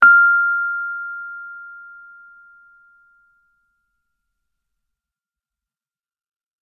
C S Rhodes Mark II F5
Individual notes from my Rhodes. Each filename tells the note so that you can easily use the samples in your favorite sampler. Fender Rhodes Mark II 73 Stage Piano recorded directly from the harp into a Bellari tube preamp, captured with Zoom H4 and edited in Soundtrack.
electric, fender, keyboard, multisample, piano, rhodes, tine, tube